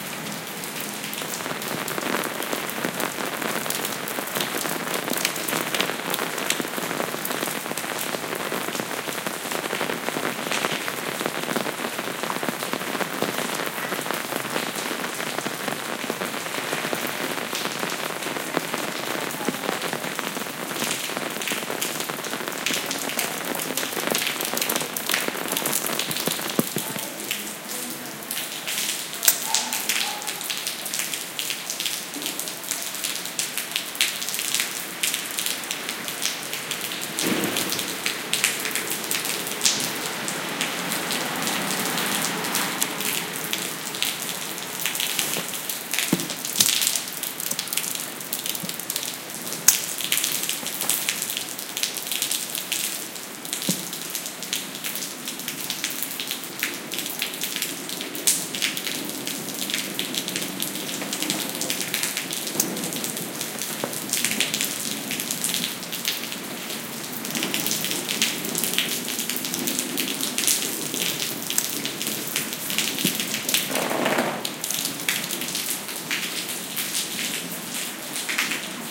20061025.rain.umbrellas
rain fall on my umbrella and to the floor / lluvia que cae en mi paraguas y al suelo